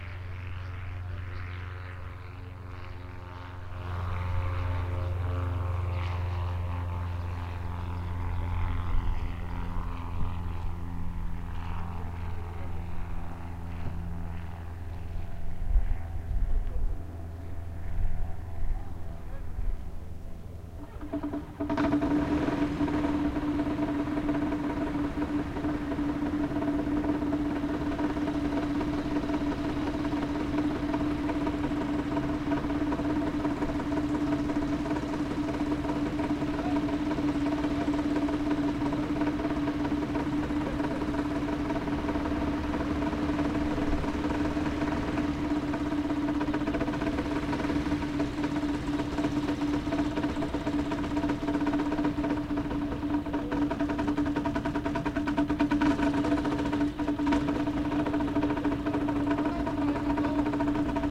Airplanes are taking off or landing at the airport. Distant people noises.
aeroplane, flight, plane, airplane, aircraft